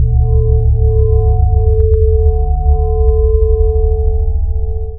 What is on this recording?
Low frequency rumble with a higher frequency drone (i guess you would call it)...
bass, drone, rumble